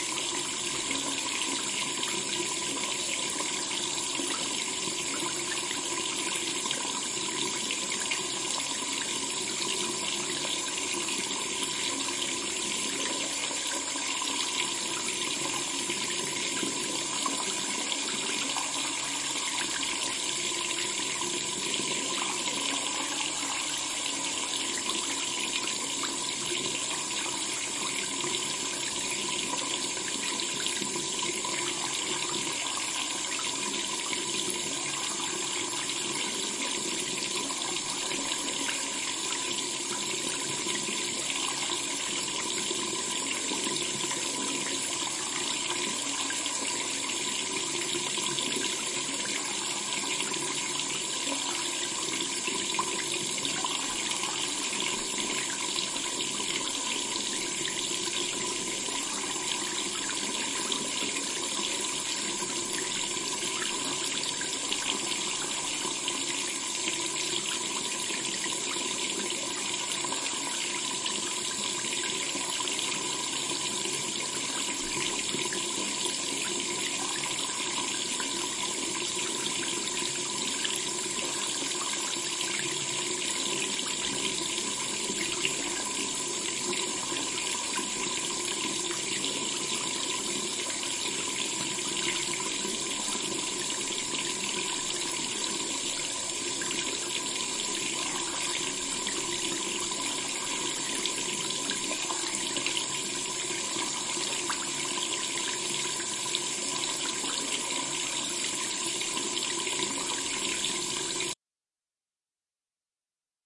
Water running down the sink (medium)
Water pouring down the sink, medium intensity...
medium, bathroom, pouring, water, recording, sink, field-recording, intensity, field, stereo